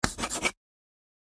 01.24.17: Cut up samples of writing with chalk on a blackboard.